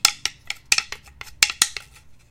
This is the sound of two sticks banging together. I used it for the sound of crutches banging into each other, but I think the sound works for anyone who is trying to recreate wood things hitting each other.
The sound was recorded on a Blue Yeti microphone recording into Audacity on a Mac and using two sticks from a carrom table to make the noise.
clack, hitting, knocking